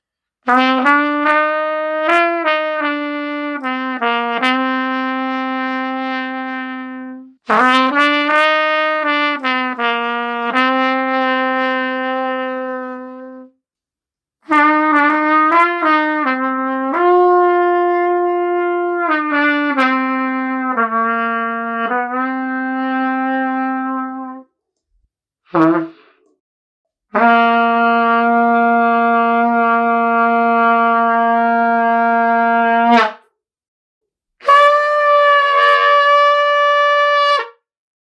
Trumpet Sound Pack by Daivish Lakhani
MUS152, Trumpet, DM152